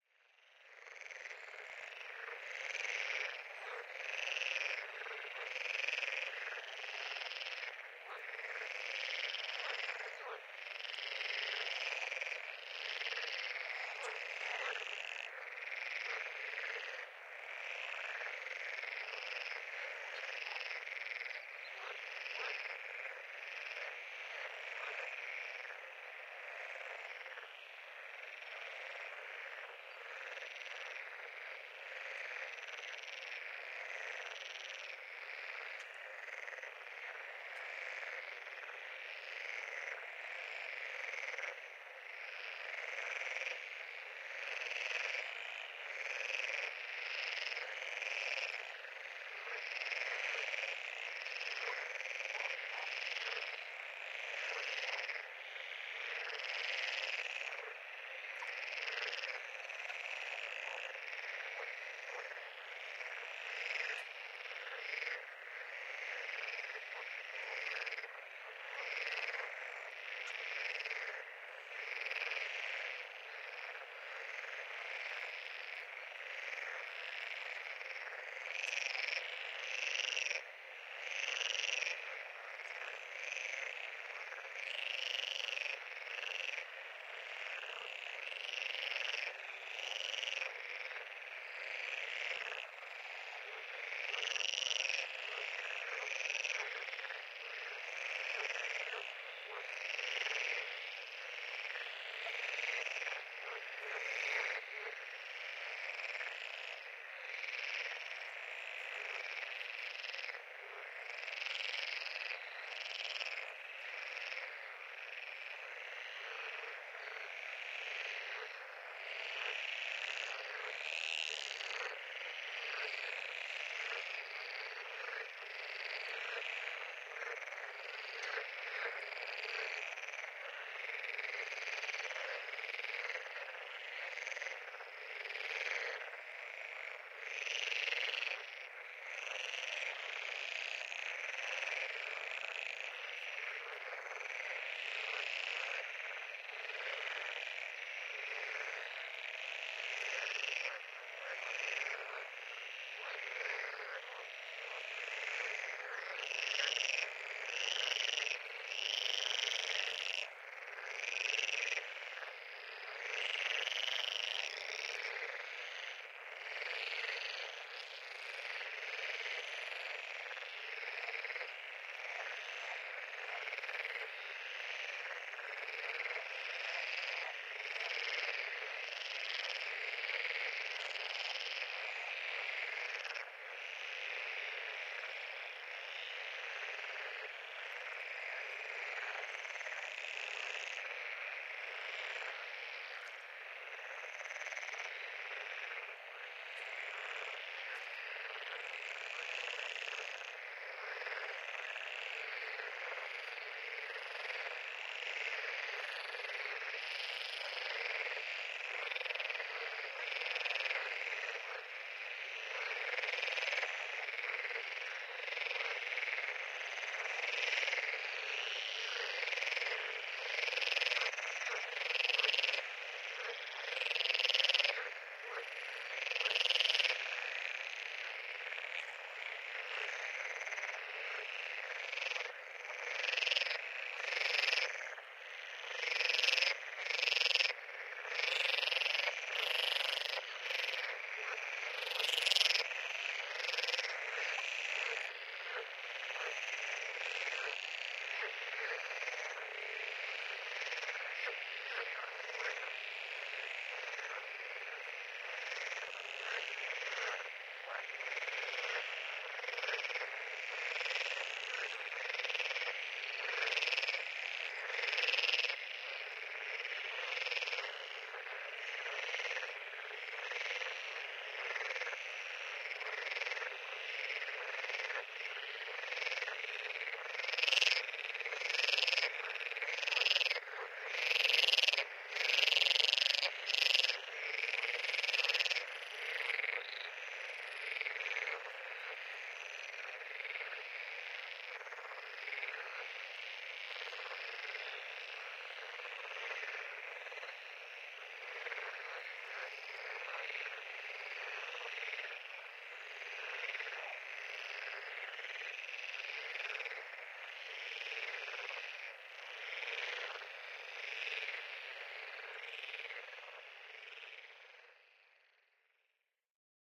Frogs croaking. Recorded at a pond in the "Gildehauser Venn", Germany.
Recorder: Zoom H4n
Mics used: 2x RØDE NT1-A (matched pair) in ORTF position.
ambiance, ambient, croak, croaking, field, field-recording, frogs, nature, pond, recording, stereo